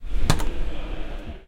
Elevator button pressed. The button is inside the elevator and indicates the floor desired.